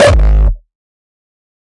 One of my Rawstyle kick G
hardstyle, kick, rawstyle